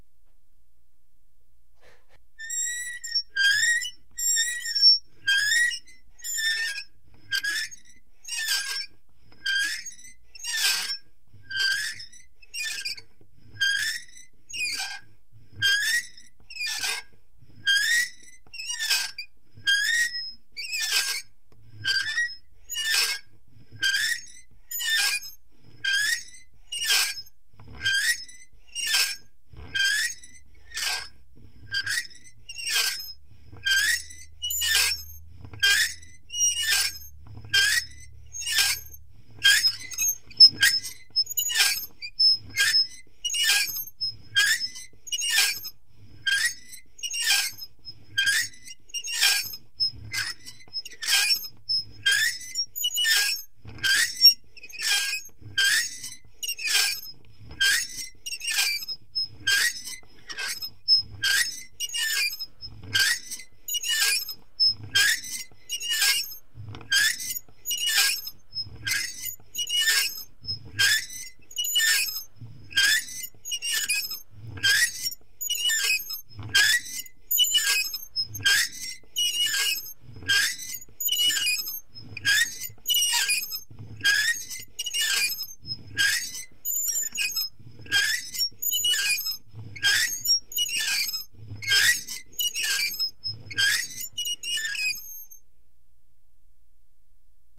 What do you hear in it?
Meat Grinder10M
I recorded these sounds made with a toy meat grinder to simulate a windmill sound in an experimental film I worked on called Thin Ice.Here is some medium slow squeaking.